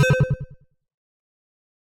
A notification sound, or something being hit.
Created using SFXR.